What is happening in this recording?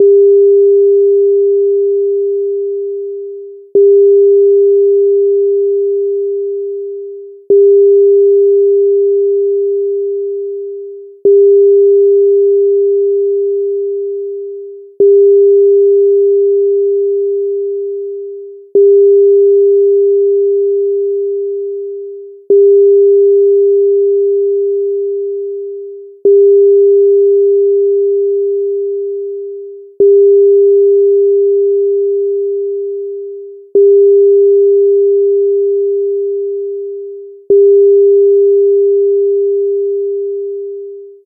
Sinusoidal sound (500 Hz) with melt closure, repeated 11 times.
bell, 1